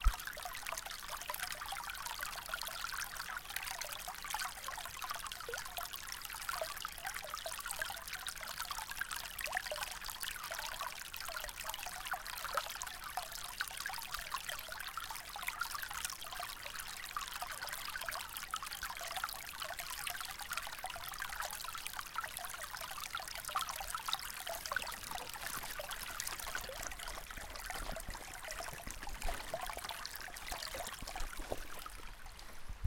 A small burn trickling through a wooded area.